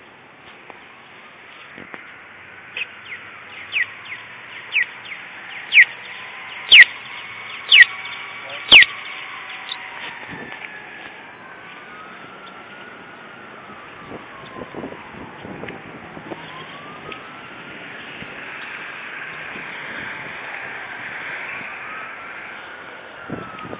Cross Walk
The chirping sound from a crosswalk traffic light beeping. The siren from a fire-engine can be heard in the background. There is also some distant city traffic.